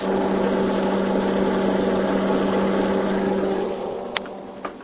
I think this was a dryer, but it might've been a freezer generator.
dryer, freezer